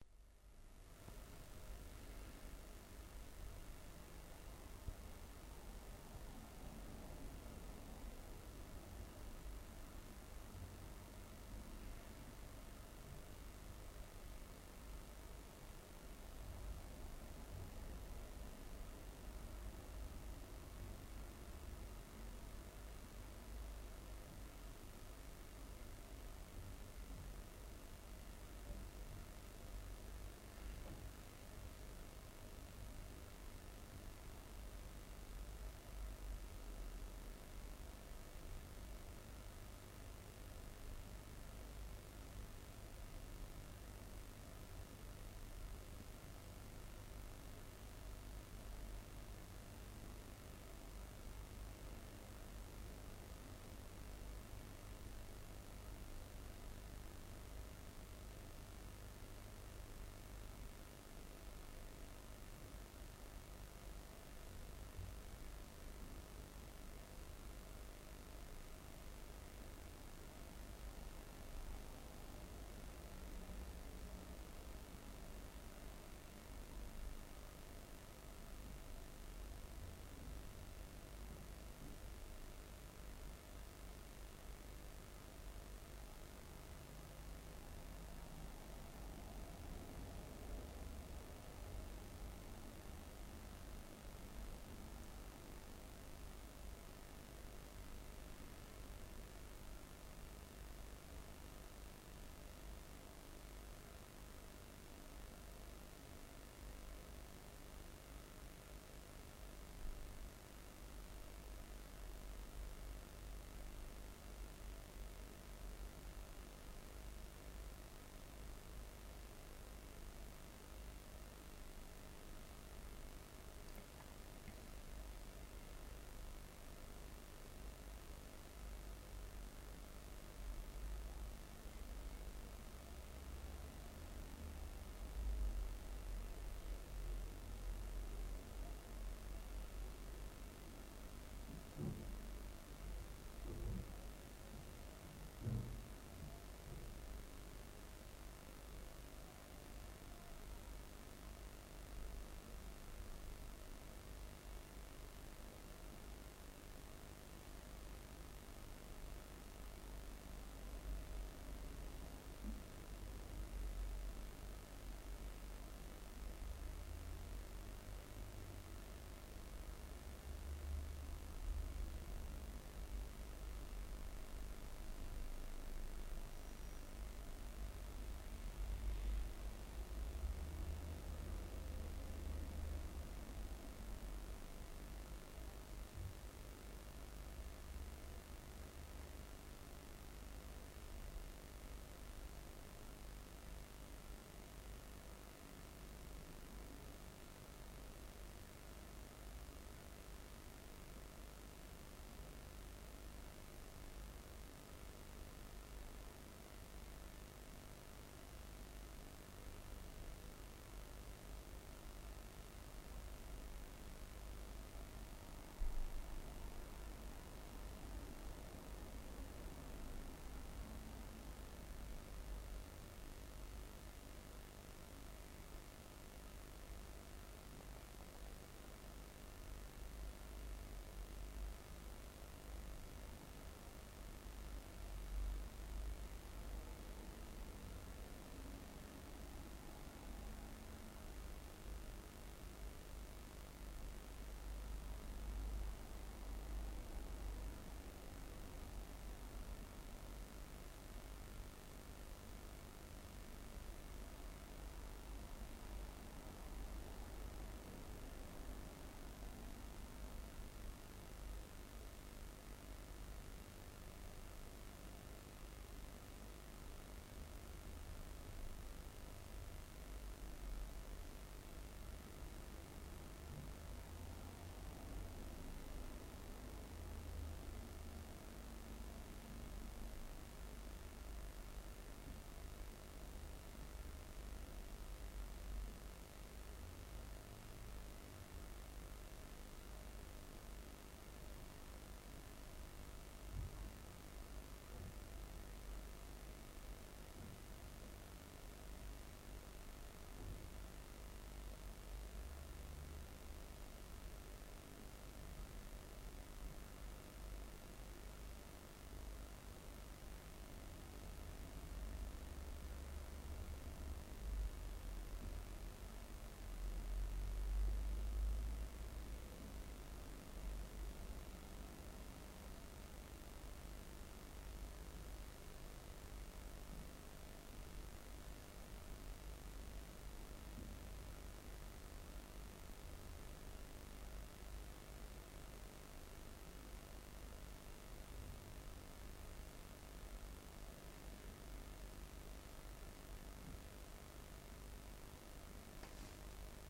ECU-(A-XX)169 phase1
Engine Control Unit UTV ATV Trail Path Channel Wideband Broadband MCV Dual Carb SOx COx NOx Atmospheric Calculator Fraser Lens Beam Optical Iso Synchronous Field T2 T1xorT2 Mirror symmetry Convergence